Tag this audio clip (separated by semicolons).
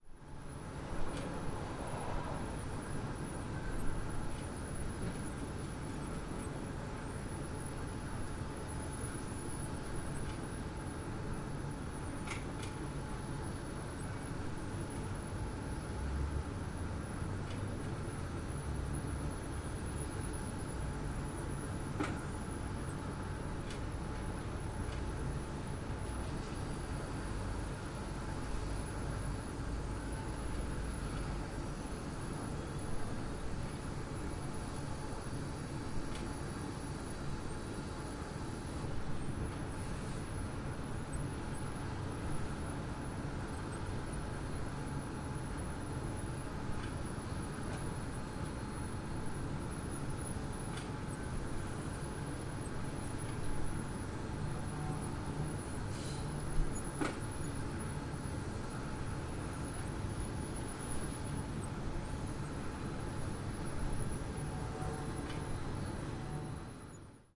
machines; swoosh